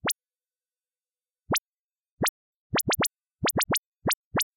These are electronic sweeps through all frequencies, starting at the low end
electronic, processed